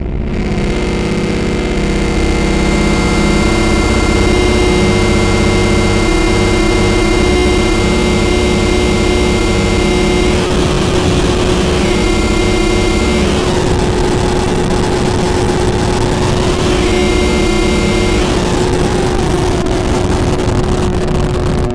Sounds from an analog sound device called 'The Benjolin' a DIY project by Rob Hordijk and Joker Nies. Sometimes recorded in addition with effects coming from a Korg Kaoss Pad.
analog, benjolin, circuit, electronic, hardware, noise, sound, synth